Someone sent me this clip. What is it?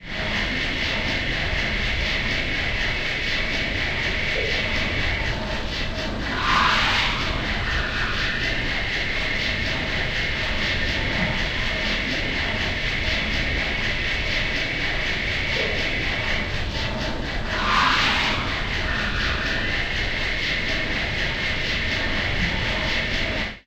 A factory sound simulated with brush-teeth sound loop samples. Processed with DSP-Quattro X.